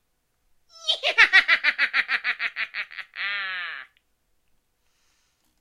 evil laugh-11
After making them ash up with Analogchill's Scream file i got bored and made this small pack of evil laughs.
male, laugh, solo, single, cackle, evil